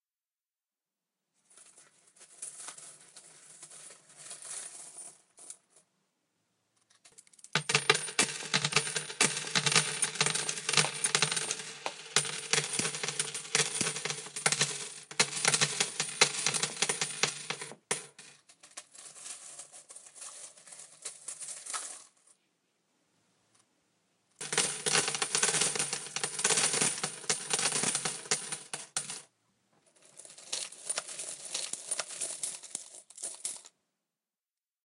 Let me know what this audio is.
Scooping up a handful of change and dropping it on a wooden table top.